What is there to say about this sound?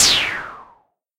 Downward Whoosh
I think something zoomed downward right in front of you. I basically generated white noise with Audacity and applied a fade-out effect to it. Then I filtered it with the filter envelope in the Instruments section using OpenMPT 1.25.04.00. Then I played it back in a different note while recording it with Audacity, and applied another fade-out effect.